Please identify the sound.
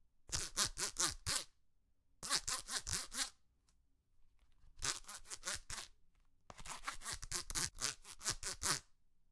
Flip Flop Manipulation
Rubbing the flip-flop bottoms together to create a unique sound. This is the manipulated file.